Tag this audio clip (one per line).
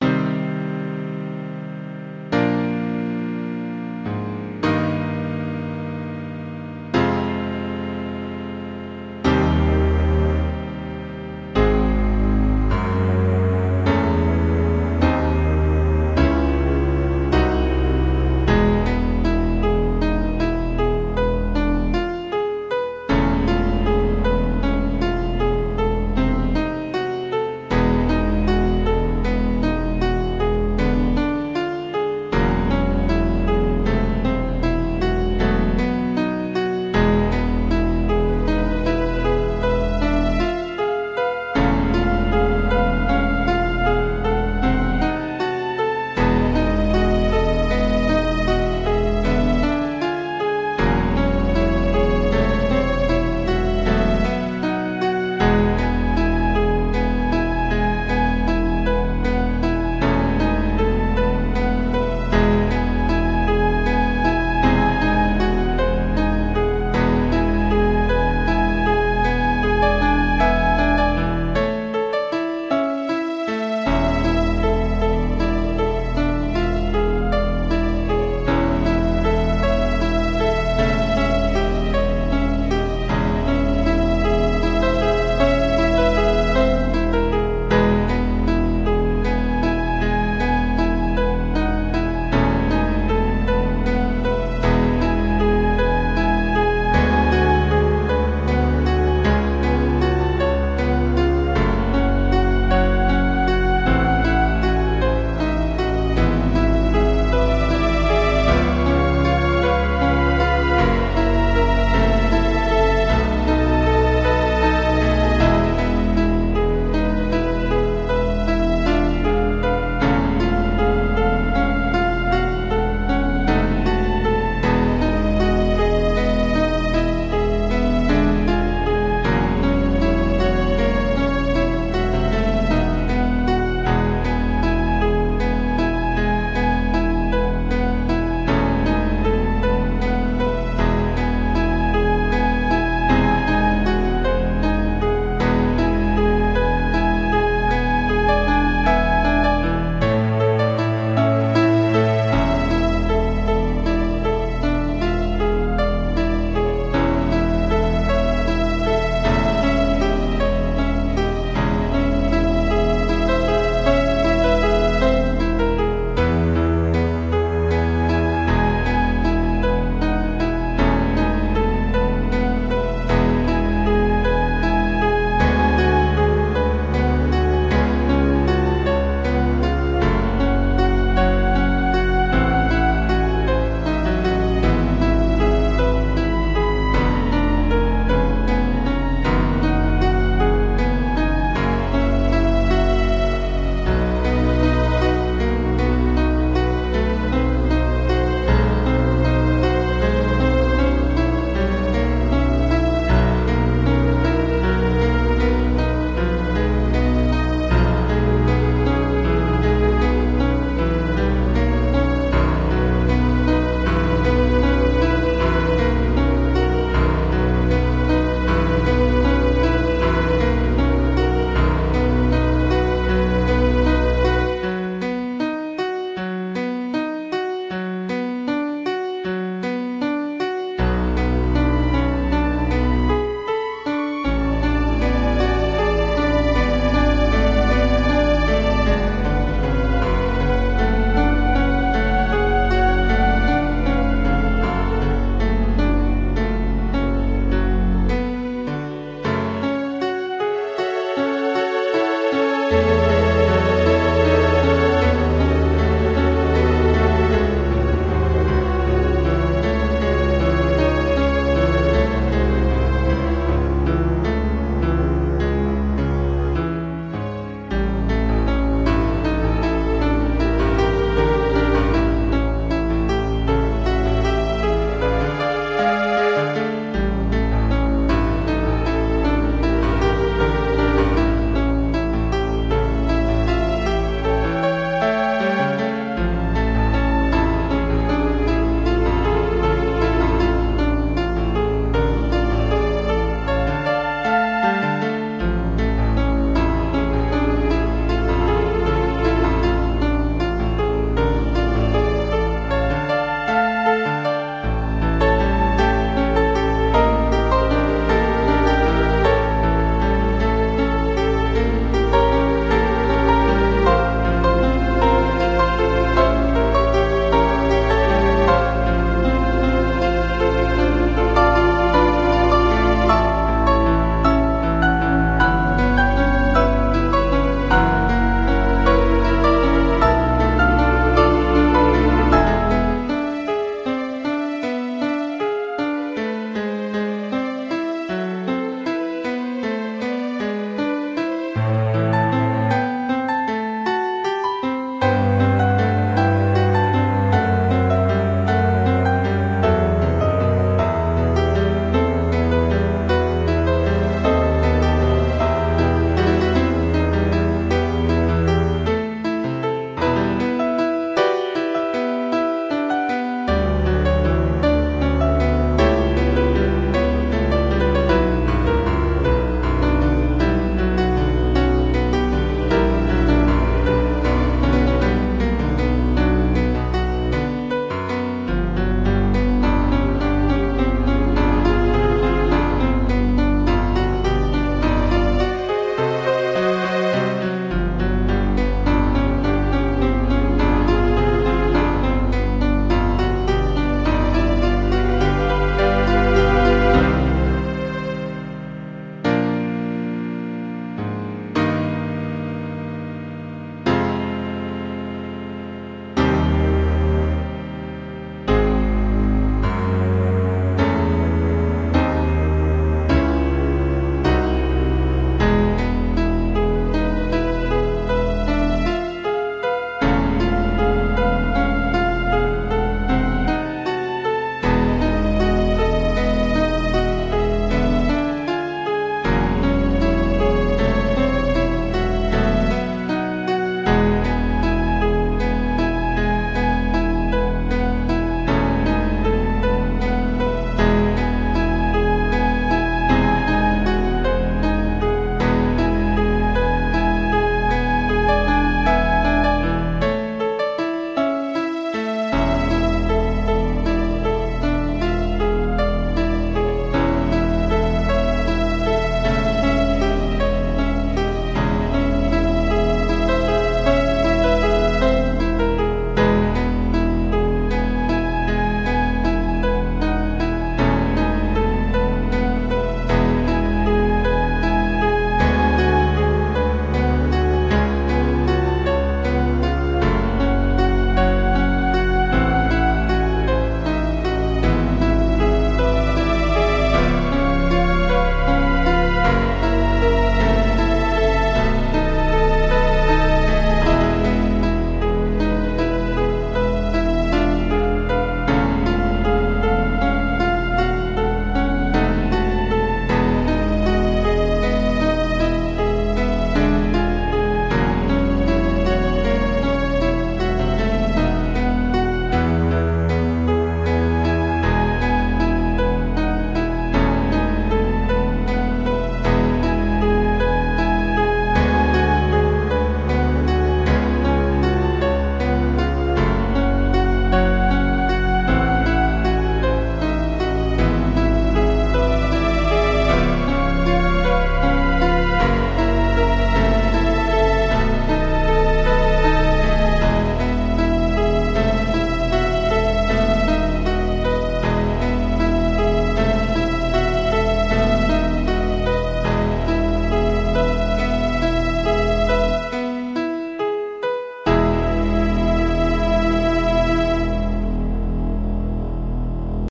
concerto
russian